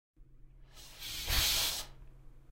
A science fiction, space age sliding door noise made for my sci fi sitcom Accidentally Reckless. I think this one was made from me making a 'tsch' noise but then with lots of other things done to it afterwards. I think it worked ok (much better than than the one I made out of me making 'zzzz' noises.